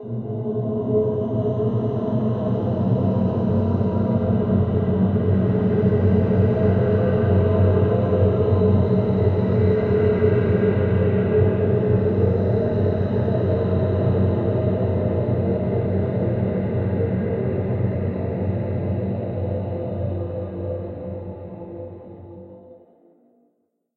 A snippet of vocal convolved with weird flowing granular sounds.